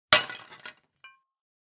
Clay jar falls and breaks.